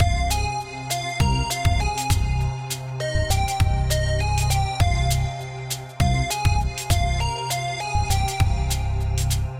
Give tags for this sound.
Cinematic; Loop; 100-BPM

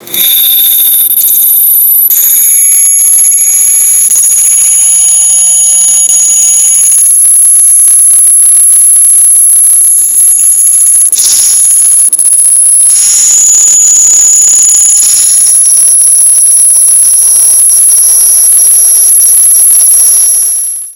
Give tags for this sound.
effects; feedback; iphone

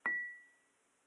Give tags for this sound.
pouring; teacups; spoon; saucers; clink; clank; tea; coffee; cups

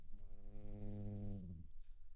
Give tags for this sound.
buzzing; flying; bugs; detail; interior; sting; wasp

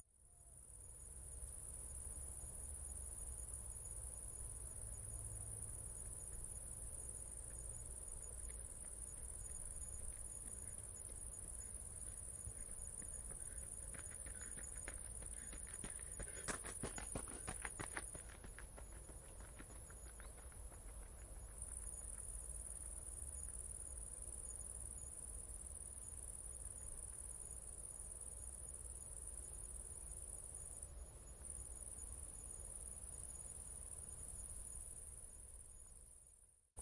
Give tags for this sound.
crickets; feet; foot; footstep; footsteps; gravel; Jogger; park; running; sport; step; steps; suburban; walk; walking

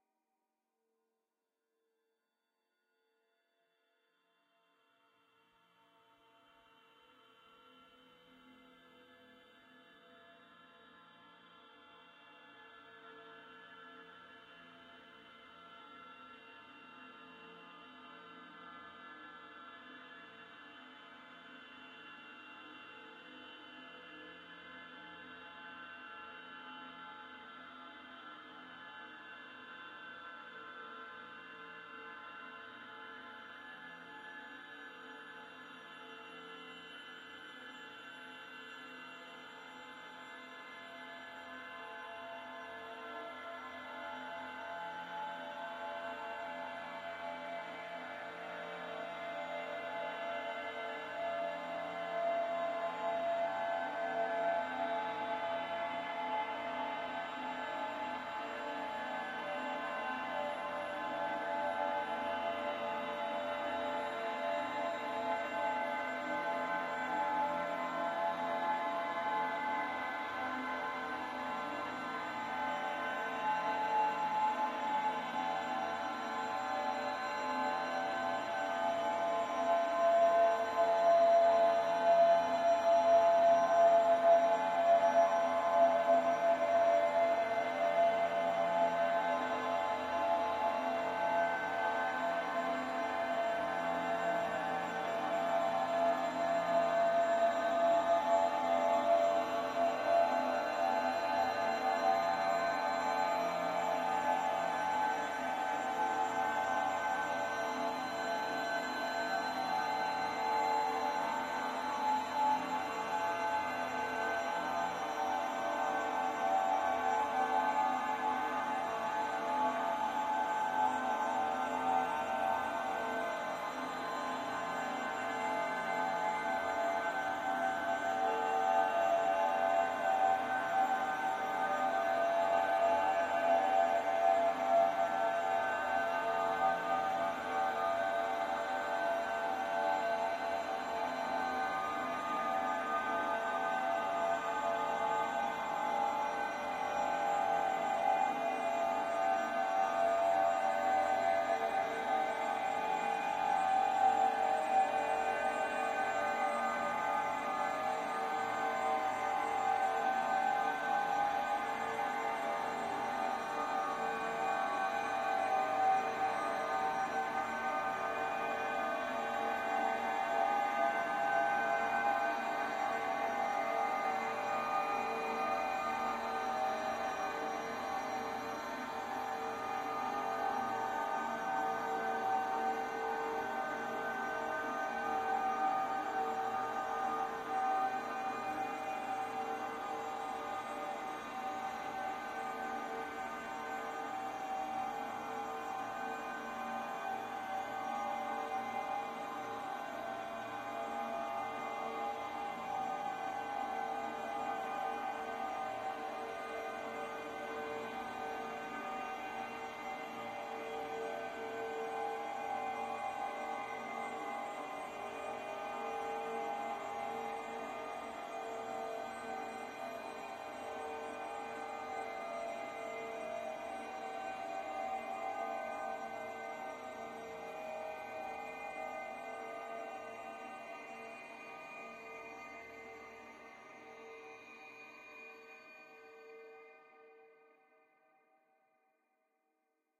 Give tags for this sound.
divine; dream; drone; evolving; experimental; multisample; pad; soundscape; sweet

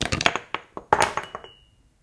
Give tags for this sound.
objects; crash; chaotic; clatter

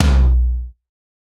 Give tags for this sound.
drum-n-bass; floor

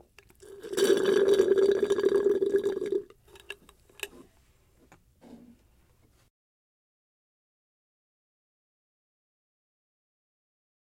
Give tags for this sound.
bebida; canudo; cocktail; drink; sip; soda

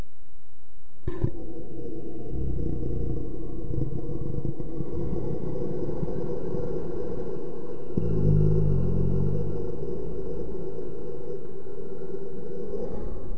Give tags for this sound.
grumble; haunted; ghost; spooky; monster; haunt; demon

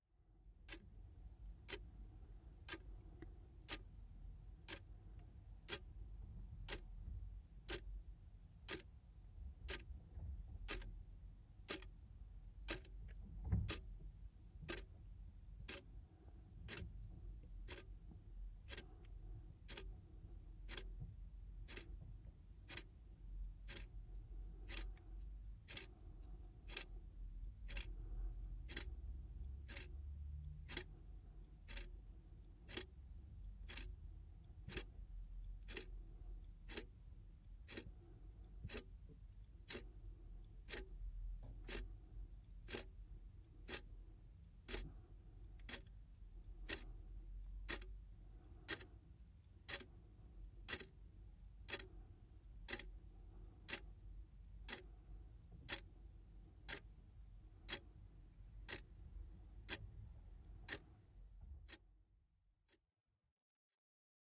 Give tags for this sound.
time; watch; tick; clocks; ticking; tick-tock; Clock; foley

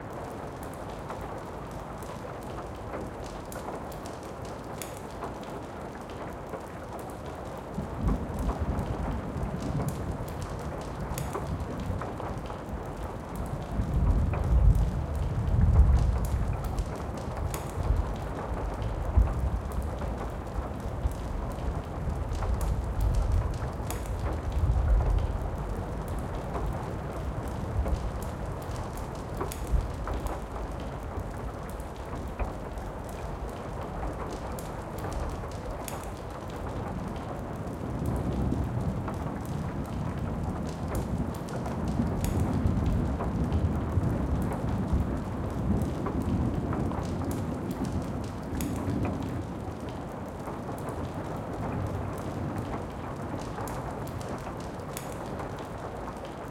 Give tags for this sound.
background-sound; video; field-recording; background; house; zoom; atmosphere; rode; raining; ambience; wood; interior; ambiance; thunder; fire; firecracks; ambient; games; rain; loop; thunders; general-noise; nature; tascam; fireplace

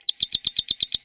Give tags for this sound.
POWER; machinery; industrial; coudre

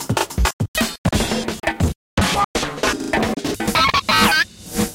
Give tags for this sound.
breakcore; collage; glitch

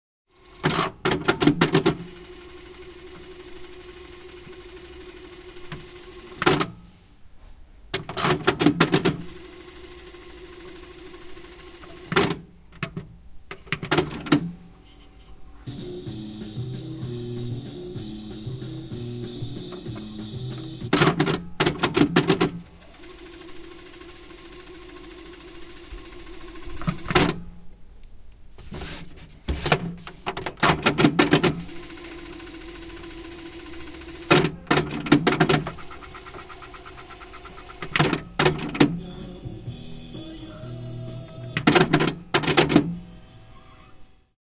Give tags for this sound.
cassette; machine; tape

